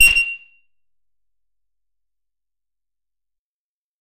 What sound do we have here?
Tonic Harsh Whistle
This is a harsh whistle sample. It was created using the electronic VST instrument Micro Tonic from Sonic Charge. Ideal for constructing electronic drumloops...